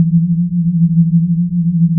quadronaurality loop
a very short sequence, featuring 1, 5, 10 and 16 hz binaural beats at once (1 = delta, 5 = tetha, 10 = alpha and 16 = beta
On the left channel, there is 164hz (base) and 170hz (tetha)
On the right channel, there is 165hz (delta) and 180hz (alpha and beta, depending on the point of view: to the base, it's beta but to the 170hz, it's alpha...)
the whole thing is a 2sec perfect loop.
Made with audacity and the Nyquist plugin "Binaural tones with surf 2"
short, beat, loop, headphones, alpha, binaural, sine